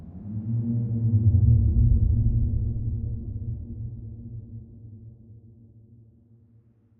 deep sea groan
A deep sub groan, created using Native Instruments Massive. No additional processing.